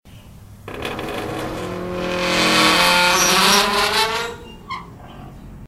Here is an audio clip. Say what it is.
Metal Door Creaking Closing
Metal door in the kitchen of my house
creak, creaking, creaking-door, door, door-creaking, metal-door, old-metal-door